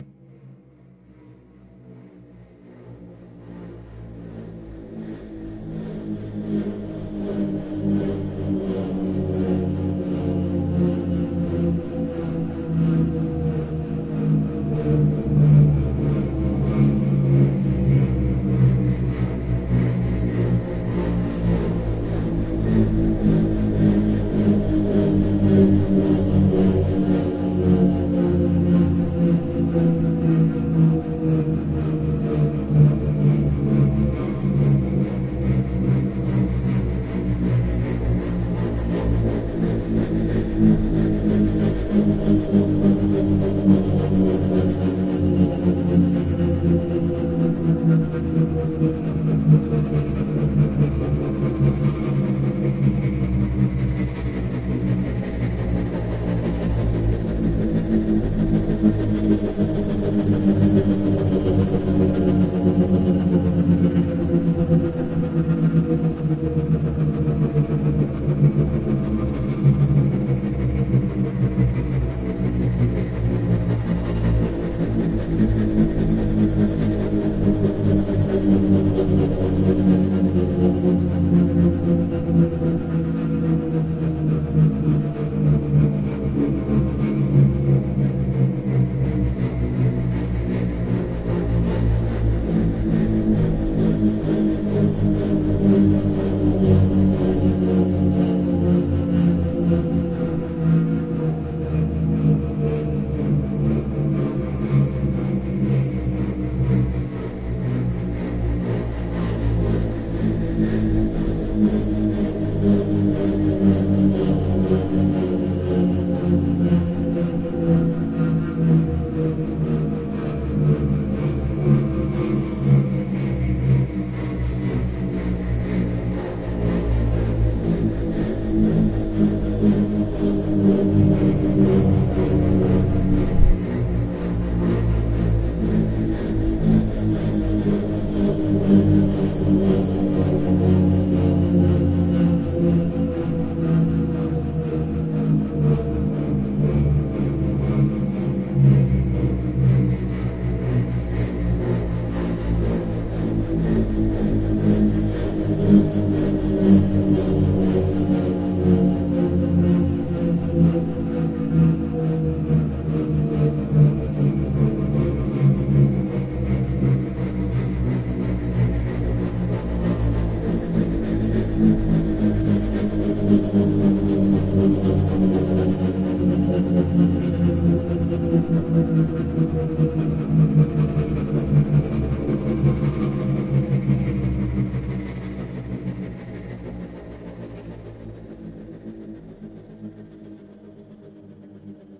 Dark Shepard Tone 2
My second descending Shepard tone created on Renoise DAW with the help of "mda Shepard" and few other modifications were applied. As the first version, this sound was also inspired by Hans Zimmer's Shepard Tone from the new Blade Runner 2049 track called "Furnace". I hope You use it well...
anxious, atmosphere, background-sound, creepy, dark, descending, downwards, drone, falling, fear, film, hans, haunted, horror, intense, movie, ost, phantom, renoise, scary, shepard, sinister, soundtrack, spooky, suspense, thrill, tone, trippy, zimmer